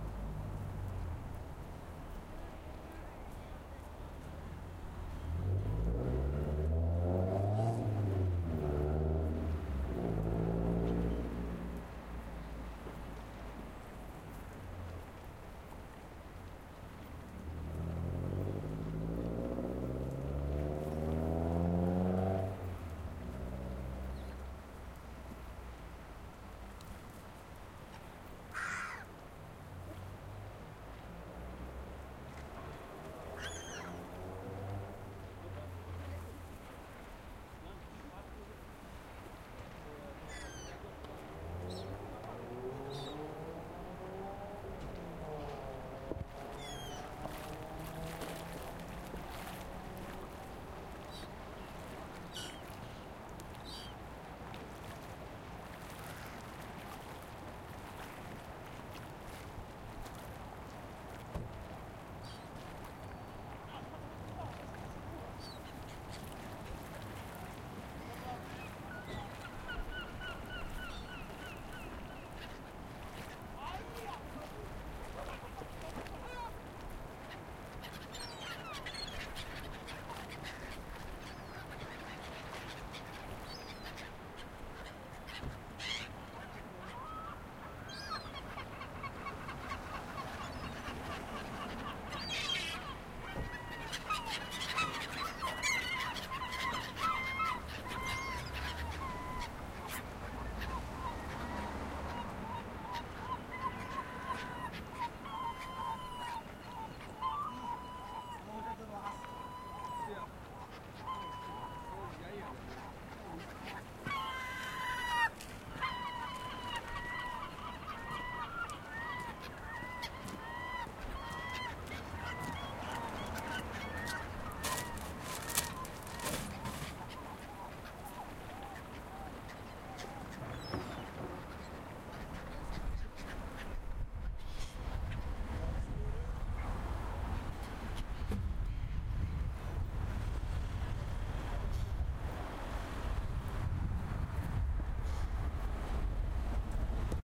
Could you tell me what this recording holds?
Content warning
I recorded it in South Queensferry under the bridge near the Forth Railway Bridge, Scotland. On recording, you can hear traffic on the road and gulls and sounds from there. Conversations.
car, cars, conversations, field-recording, road, seagulls, street